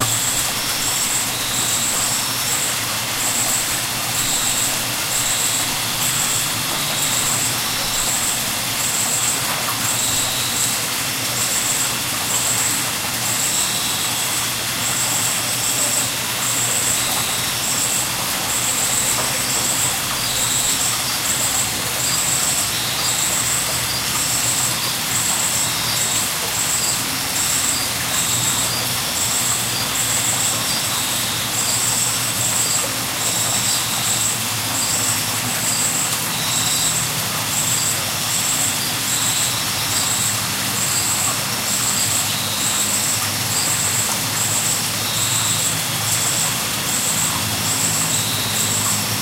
Costa Rica 4 - Waterfall Stream Insects
ambiance, costa-rica, summer, central-america, stream, field-recording, waterfall, insects, nature